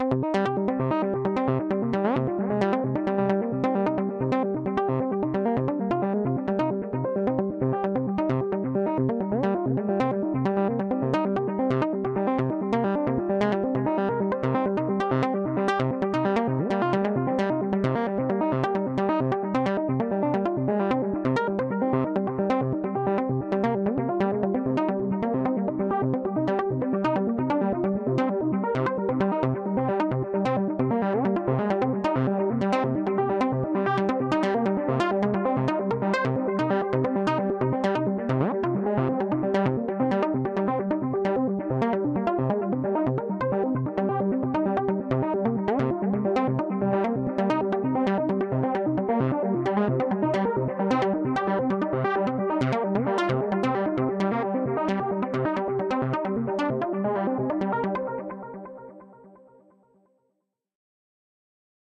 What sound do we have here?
Semi-generative analog synth sequence (with delay) in random keys.
One of a set (a - h)
Matriarch self-patched & sequenced by Noodlebox
minimal post-processing in Live
trance, 132bpm, melody, stereo, loop, sequence, modular, psychedelic, synthesizer, arp, synth, electronic, techno